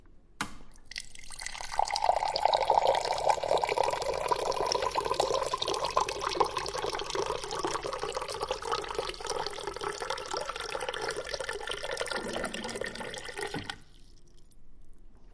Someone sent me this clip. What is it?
Pouring from water cooler
Pouring a glass of water from a cooler (listen to that distinct loud bubble at the end).
bubble, bubbles, cooler, drink, foley, glass, Water, watercooler